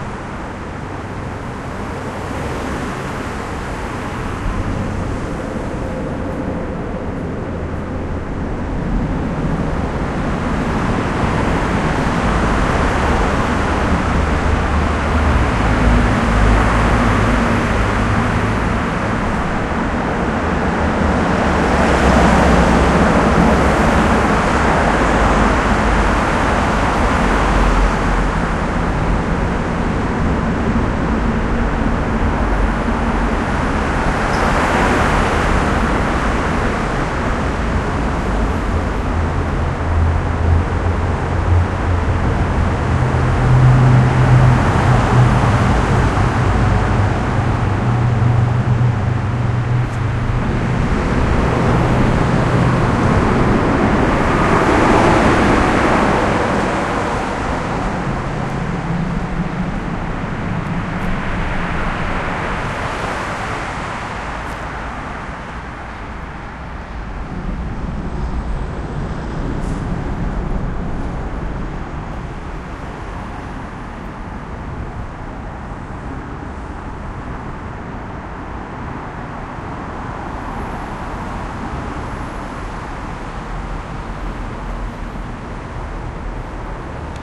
Recorded during a 12 hour work day. Taking my time passing under I-95 and recording the ominous road sounds from above and echoed traffic from inside the concrete tunnelled environment.
bus, transportation, field-recording, public, traffic